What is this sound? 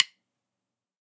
Drumsticks [Lutner 2BN (hickory)] №1.
The samples of these different types of hickory drumsticks was recorded with Reaper and M-Audio FireWire 410 sound card.
All these sounds was made with AKG D5 microphone.
1. Pro Mark L.A. Special DC hickory march drum sticks
2. Pro Mark L.A. Special hickory drum sticks 5 A;
3. Lutner Woodtip hickory drum sticks 7 B;
4. Pro Mark L.A. Special hickory drum sticks 5 B;
5. Lutner hickory drum sticks RockN;
6. Lutner hickory drum sticks 2 BN;
7. Pro Mark L.A. Special hickory drum sticks 5 BN;
8. Pro Mark L.A. Special hickory drum sticks 2BN;
9. Pro Mark L.A. Special hickory drum sticks 2B;
10. Lutner hickory drum sticks 5A.